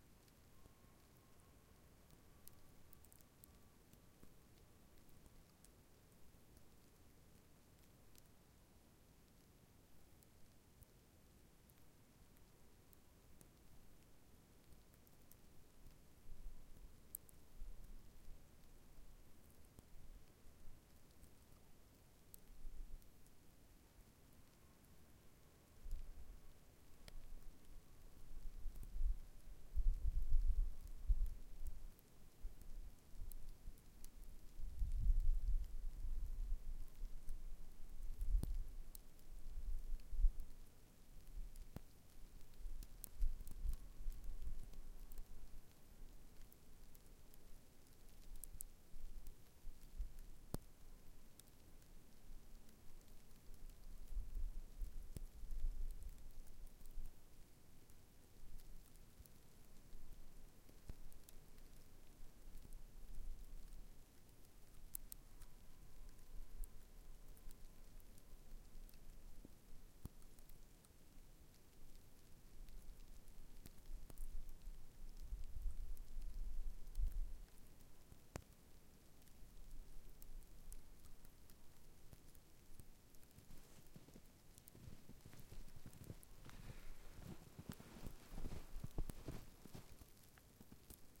fallingsnow windBACKLR
Back Pair of quad H2 recording in winter. Close proximity to freezing snow.
ambience, quad, snowflakes, field-recording, falling, winter